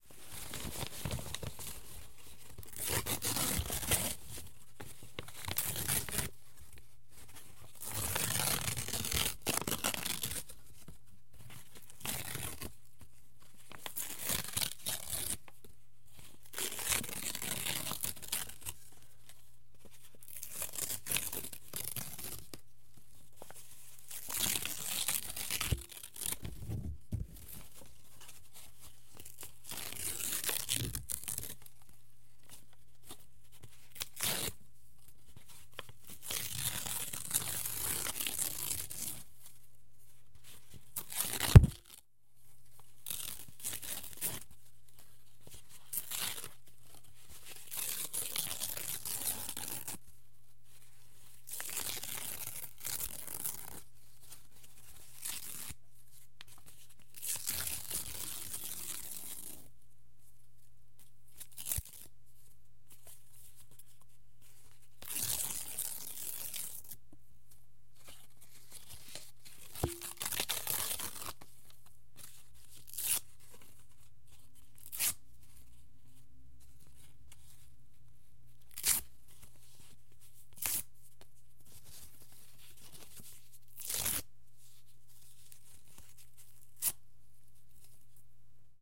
Ripping Thick Sheet 01
Someone ripping thick paper.